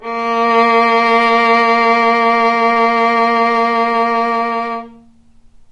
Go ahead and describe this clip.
violin arco vib A#2
violin arco vibrato
violin, vibrato, arco